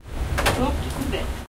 Sanisette open door announcement
This is the announcement made inside a sanisette (a self-cleaning, high-tech street toilet found throughout Paris) when the door opens after pressing the button to go in. The mechanical noises are unavoidable because the announcement is triggered by the opening of the door.
toilet, Sanisette, recorded-message